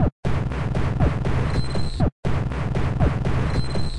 NoizDumpster Beats 09
I have used a VST instrument called NoizDumpster, by The Lower Rhythm.
You can find it here:
I have recorded the results of a few sessions of insane noise creation in Ableton Live. Cut up some interesting sounds and sequenced them using Reason's built in drum machine to create the rhythms on this pack.
This rhythm uses no effects (except EQ).
120-bpm, noise-music, noise, loop, percussion, rhythm, synth-drums, VST, TheLowerRhythm, TLR